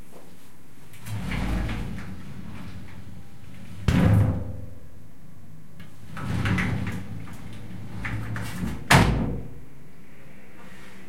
shower-door-open-close01
Sound of me opening and closing the sliding glass door to the shower. Recorded with a Zoom H4n portable recorder.
bathroom, close, closing, door, glass, heavy, shower, slide, sliding